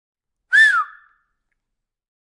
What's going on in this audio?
Whistle, Finger, Short, A
I was doing some recording in a large church with some natural reverberation and decided to try some whistling with 2 fingers in my mouth. This is one of the short whistles.
An example of how you might credit is by putting this in the description/credits:
The sound was recorded using a "Zoom H6 (XY) recorder" on 22nd March 2018.
mouth, whistling, finger, short, whistle